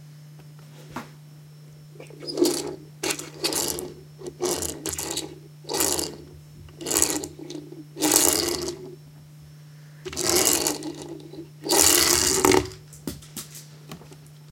A plastic toy car being rolled around the table.
Recorded with a Canon GL-2 internal microphone.
plastic, request, roll, roller, toy, toys, unprocessed, wheel